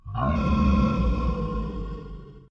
necro-nooooooo - B
necromancer death sound B variant